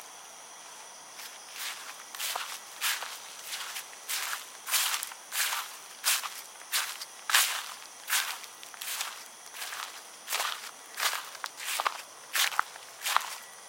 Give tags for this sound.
DRY FOLEY FOOTSTEPS LEAVES NIGHT SLIPPER WALK WALKING

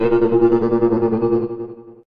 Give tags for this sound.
ambience ambient atmosphere field-recording general-noise

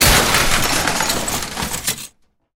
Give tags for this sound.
car chaos crash fragments glass hit impact metal shattering smack smash

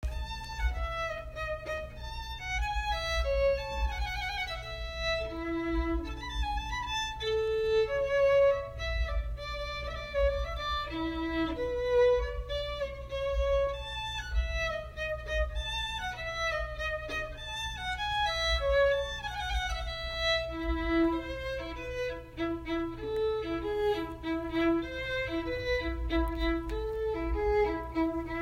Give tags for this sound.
medieval music soft Violin

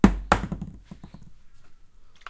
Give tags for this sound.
clangs dropped falling field-recordings hammer-hit metal-clangs thud thump whack

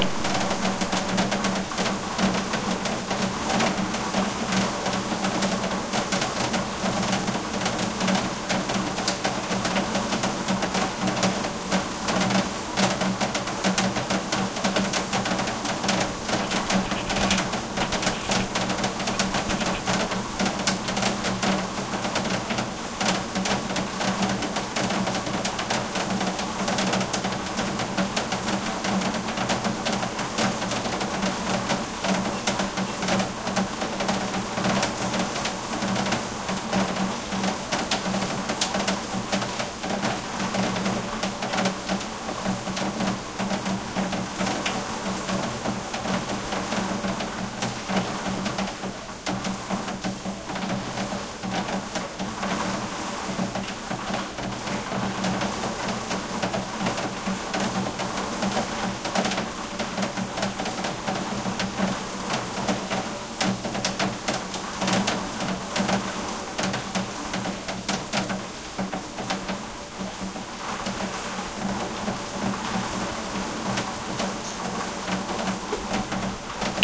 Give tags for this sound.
interior storm

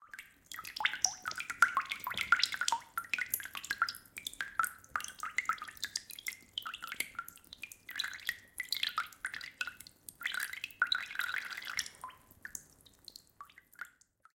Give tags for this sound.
CZ Czech drop drops long Panska water-drops

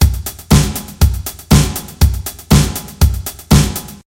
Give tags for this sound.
16ths drum groove

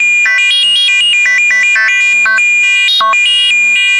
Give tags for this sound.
8-bit chip chipsound Computer glitch Lo-fi retro robot Sci-fi SFX

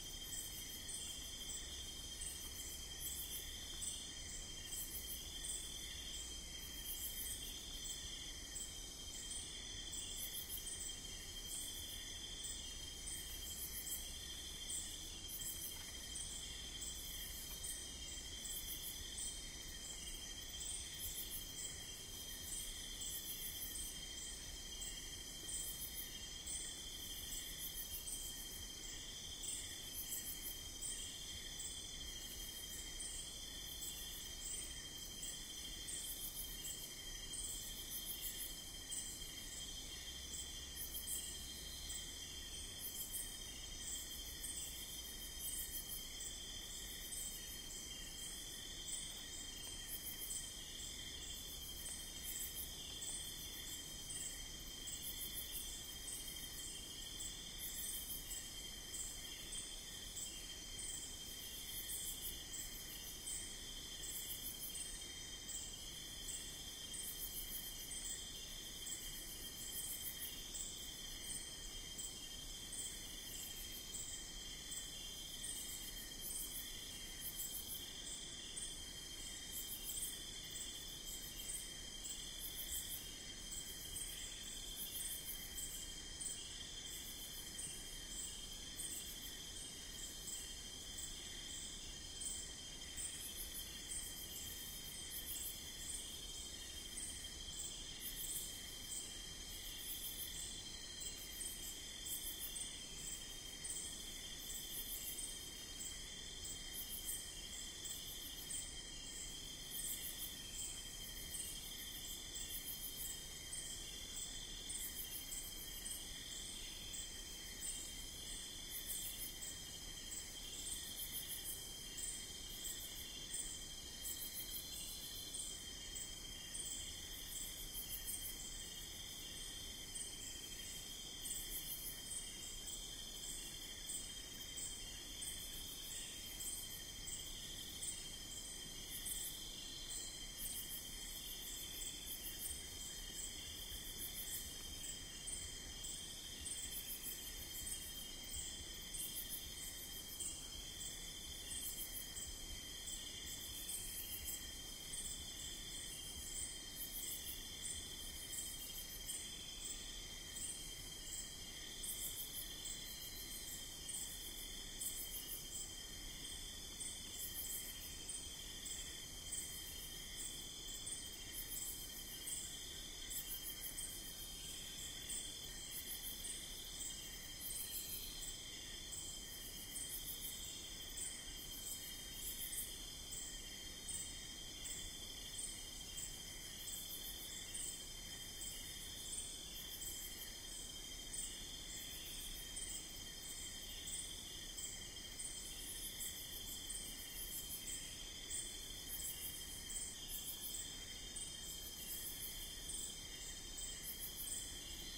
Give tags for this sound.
ambient ambience night

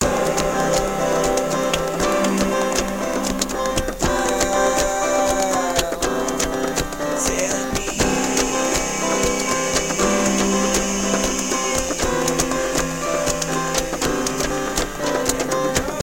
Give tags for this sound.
acapella acoustic-guitar bass beat drum-beat drums Folk free guitar harmony indie Indie-folk loop looping loops melody original-music percussion piano rock samples sounds synth vocal-loops voice whistle

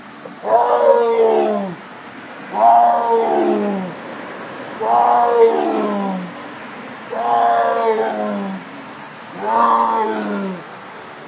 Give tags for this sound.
tiger zoo roar

